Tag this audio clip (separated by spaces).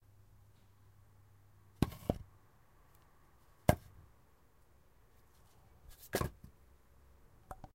wood knock